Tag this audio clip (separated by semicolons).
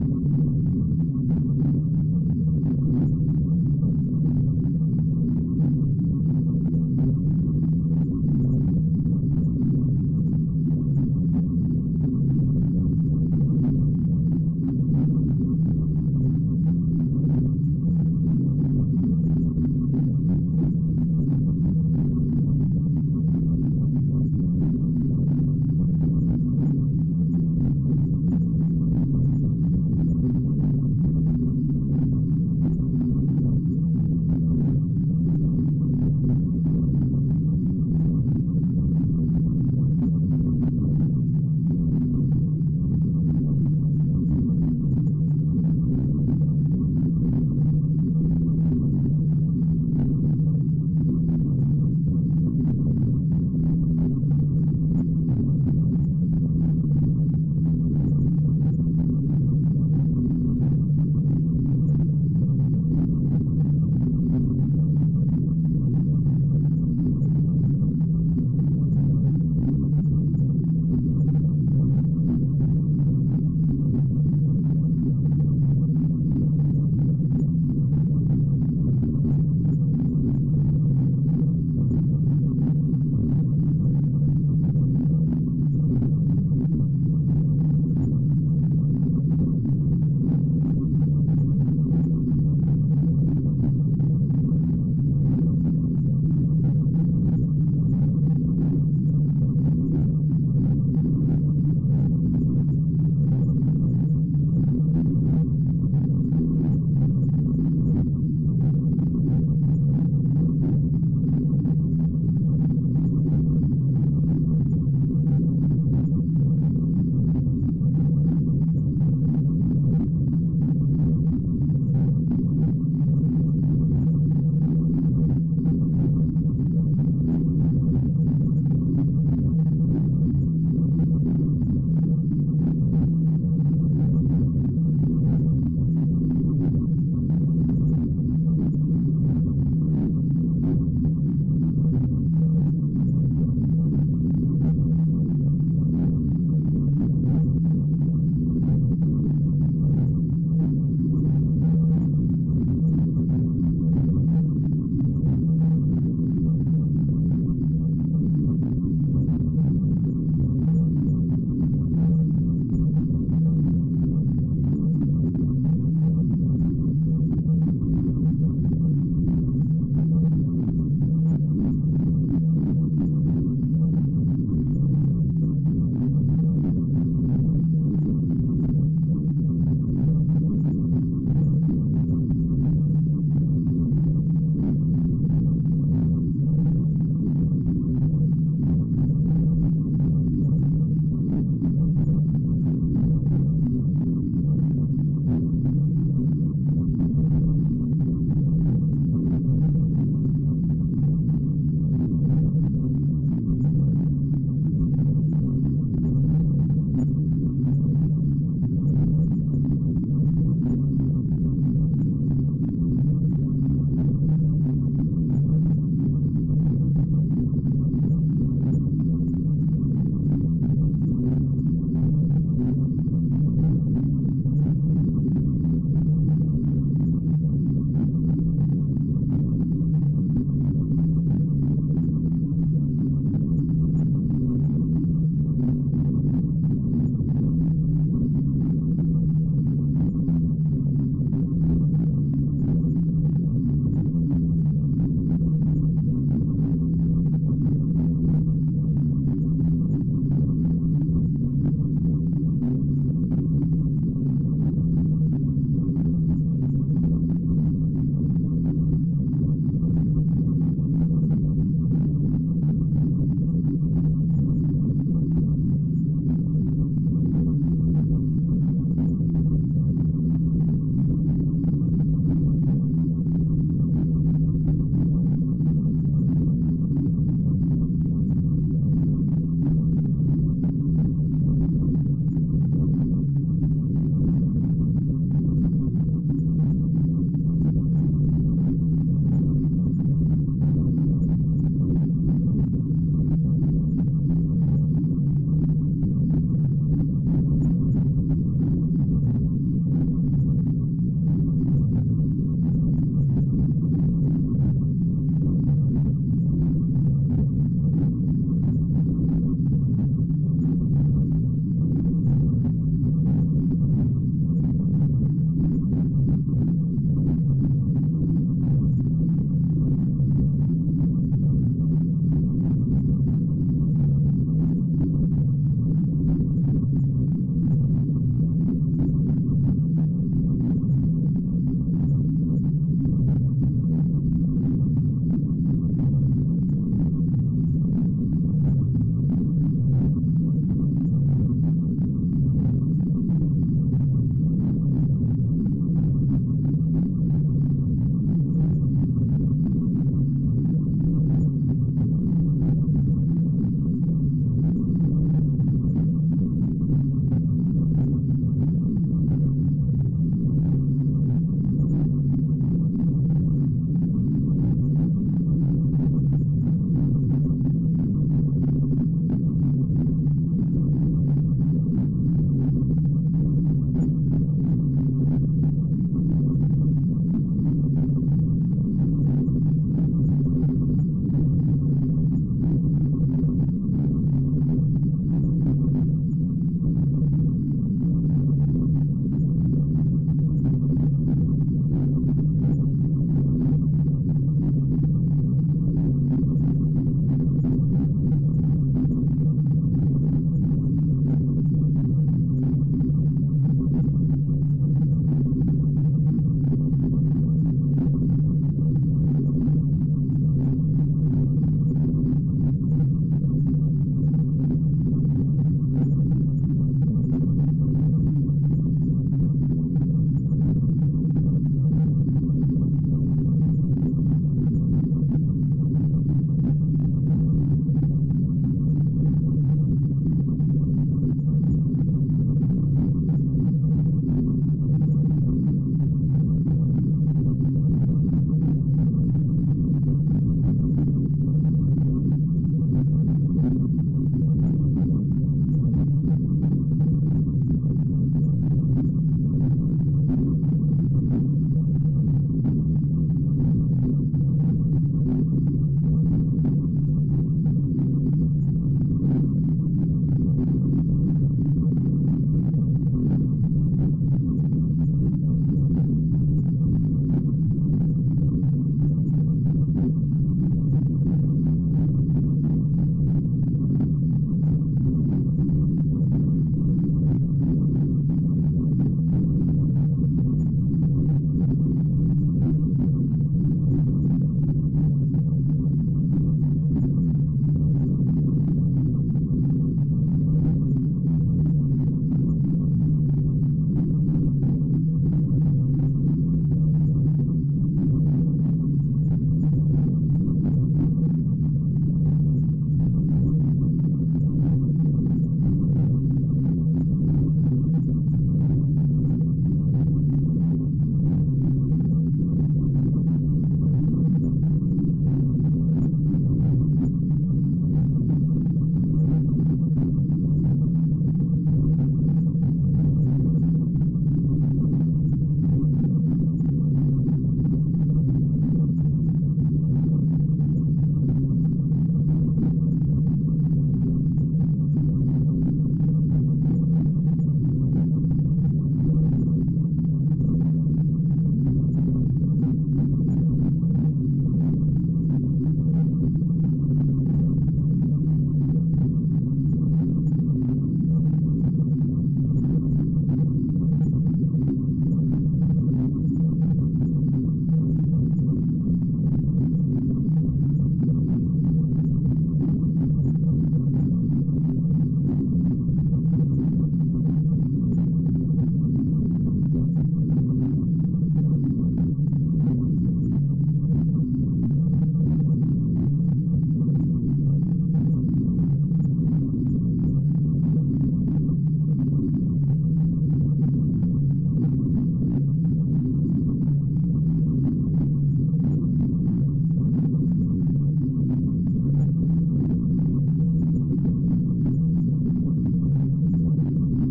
ambient; audacity; horror